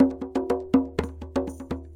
tambour djembe in french, recording for training rhythmic sample base music.
djembe, drum, loop